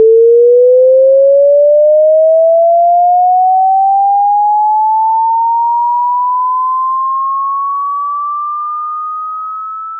explosion or comes up
A sound that sounded like an explosion of someone who could not withstand the pressure or sound of a sound. I think
NL
comes, explosion, up